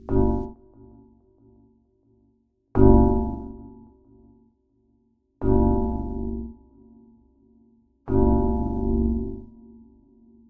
pad 005 deepspace hammered acoustics shorts
deep pad sounds based on mallet sounds, physical modelling